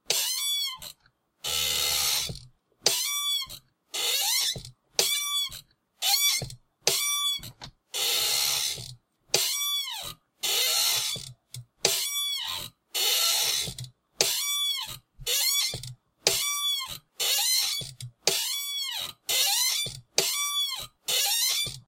Recording of my squeaky office chair. It sounds a lot like a door opening and closing. Recorded and removed noise with Audacity.